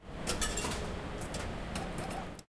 Inserting Coins machine 2
Sound of coins getting inside and dropping inside a payment machine in a car park.